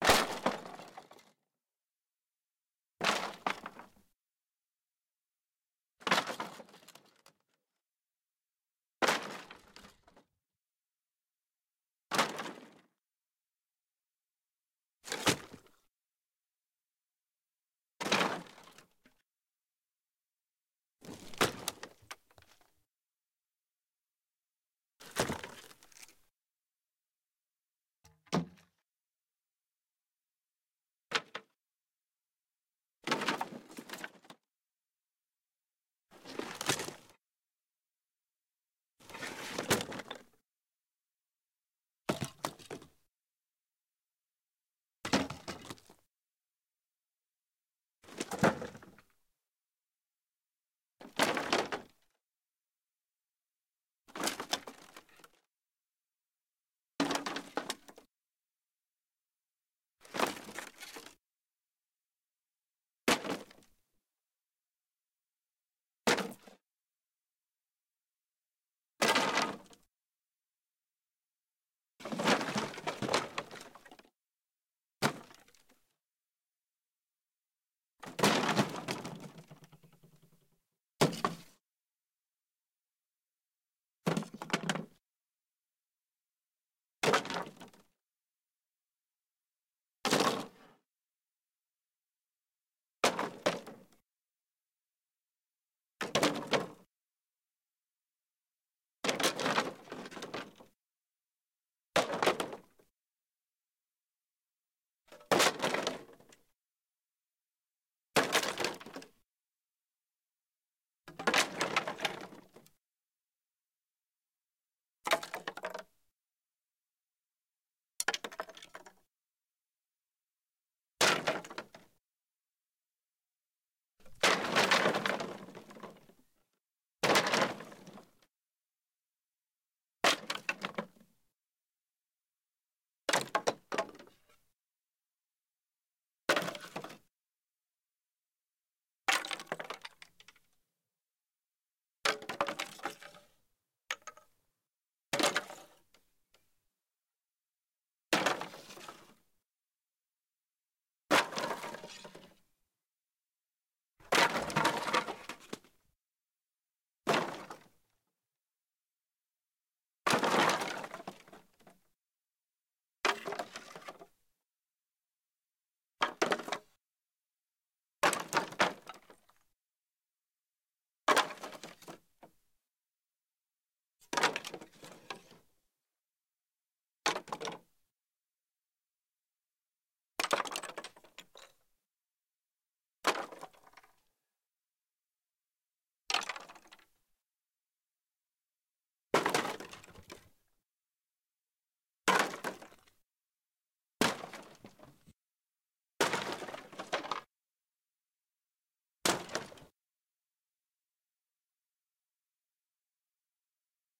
Wood impacts
wood, impact, impacts, hit, wooden